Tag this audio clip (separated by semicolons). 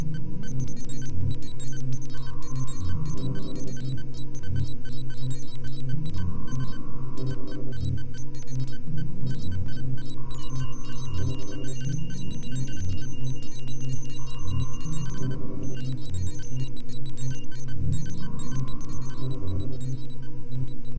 Mechanical; Futuristic; Electronic; Sci-fi; Alien; Machines; Spacecraft; Space; Noise